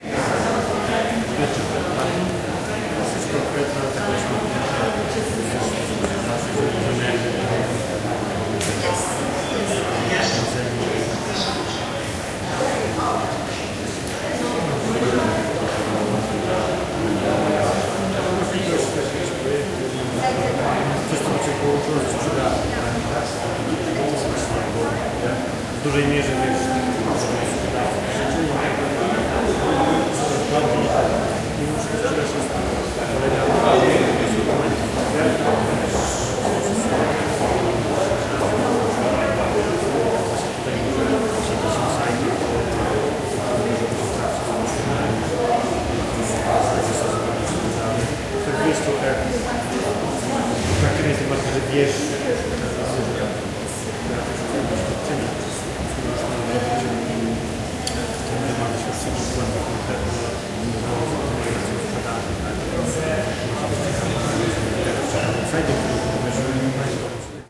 walla nike headquarters large hall busy dutch english
Zoom iQ6 X/Y stereo recording in Nike headquarters Hilversum. Large hall, lots of reverb, also useful as museum ambience.
ambiance, ambience, ambient, background-sound, chat, chatter, chatting, conversation, crowd, dutch, english, field-recording, general-noise, group, hall, interior, intern, internal, large, murmur, nike, people, reverb, soundscape, speaking, talk, talking, voices, walla